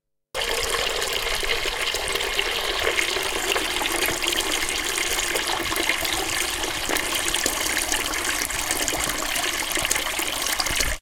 gurgling from sink.
running-water, sink, water